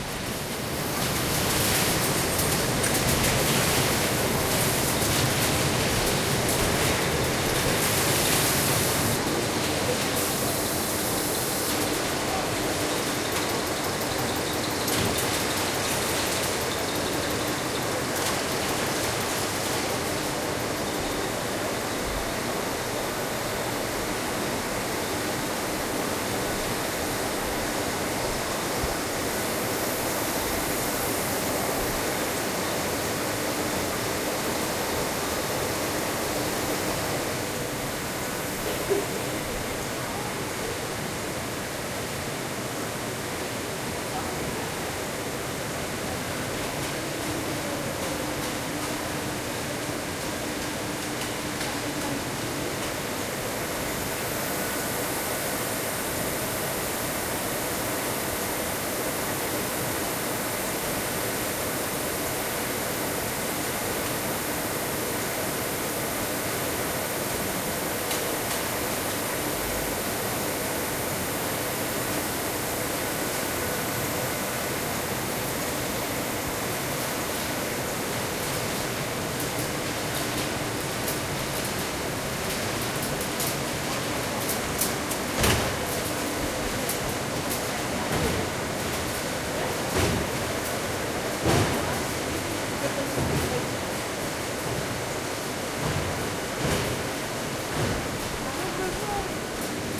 Industrial ambiance
Ambiant sound recorded on october 2015 in the visit of a warehouse in Roubaix France with a Zoom H1. Machinery noises, conveyor belt, various clatter and so. May contain some voices.
ambiant, factory, industrial, machine, machinery, mechanical, warehouse